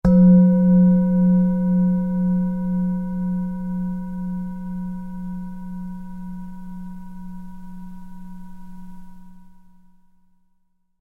singing bowl - single strike 4
singing bowl
single strike with an soft mallet
Main Frequency's:
182Hz (F#3)
519Hz (C5)
967Hz (B5)